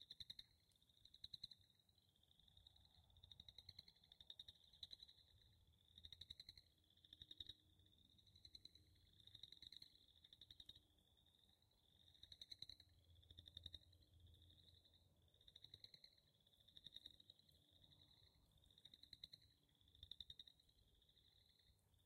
Pond Noises 2
A recording of a nearby pond at night.
Audio cut using the Super Sound Android app.
ambiance, ambience, ambient, background, cricket, crickets, field-recording, general-noise, insects, lake, nature, night, Pond, summer